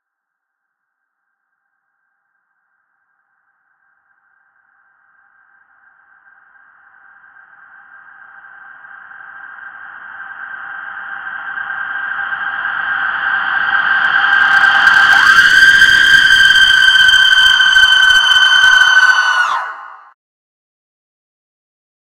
Reverse scream
Sound of a woman screaming, processed with reverb.
reverse, woman, reverb, scream, LCS-13